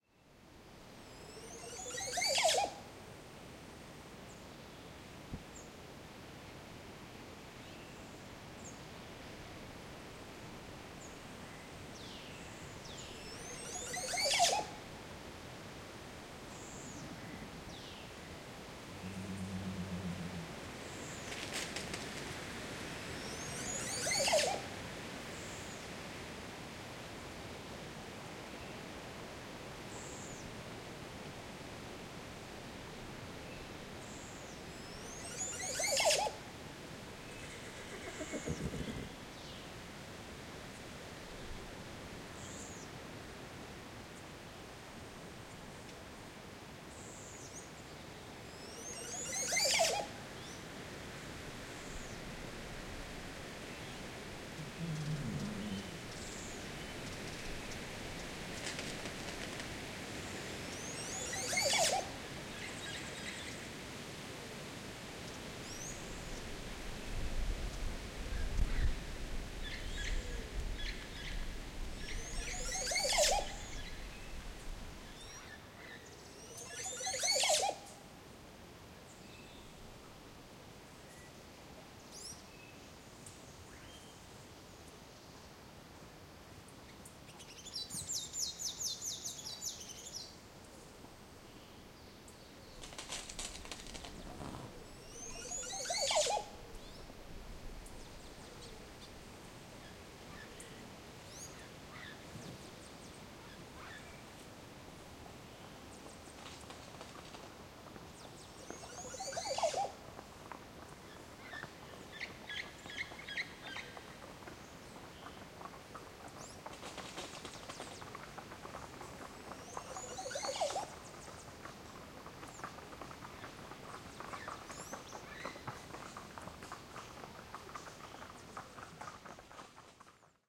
Costa Rican Oropendolo (Exotic Bird)
The Oropendolo is a bird found in central america with a very distinctive bird call that defies description.
bird, birds, birdsong, Costa, exotic, field-recording, forest, nature, oropendola, rica, spring